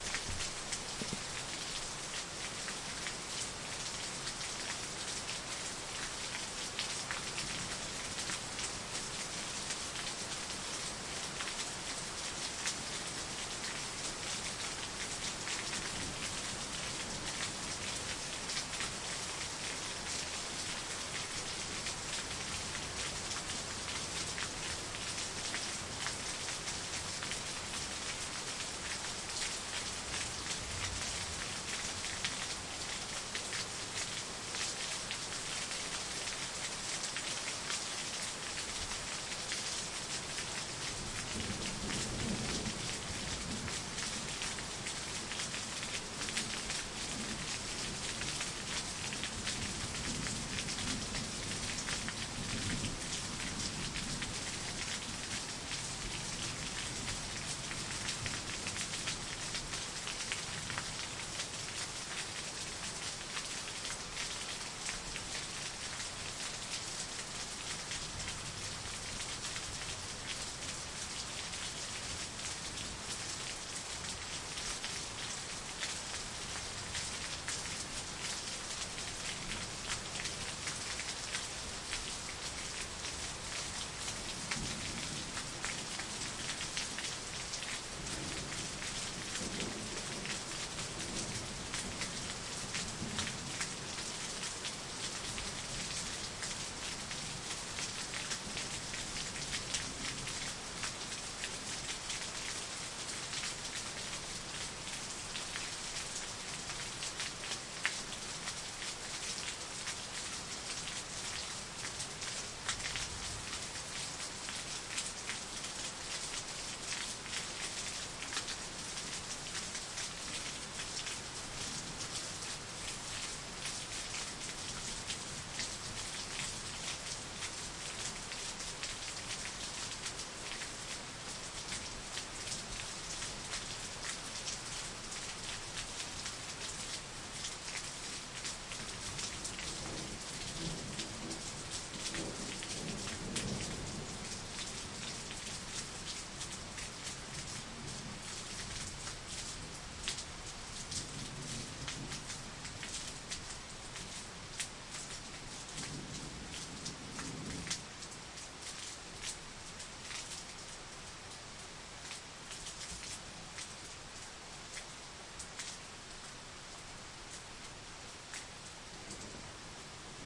Heavy Rain - Front Porch - 1
Heavy Rain from a front porch.
Recorded with Zoom H2.
Rain, Storm